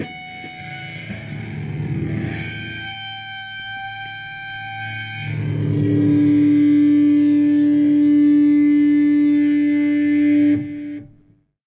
High-pitched feedback swirling into a mid-range drone before an abrupt end. This sample was generated with a Gibson SG and a VOX AC-30 amplifier. It was recorded using two microphones (a Shure SM-58 and an AKG), one positioned directly in front of the left speaker and the other in front of the right. A substantial amount of bleed was inevitable!